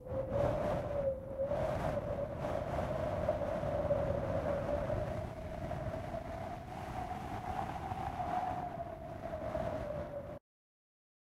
Winter is coming and so i created some cold winterbreeze sounds. It's getting cold in here!